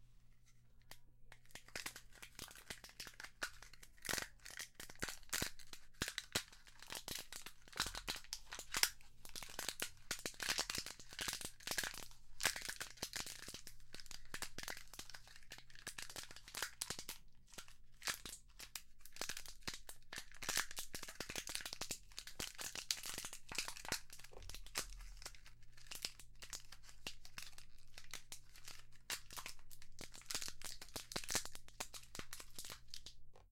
branches creaking
more branches break
action, dark, Mystery, voice